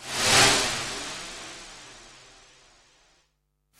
White Noise Effect
sci-fi, fx, Noise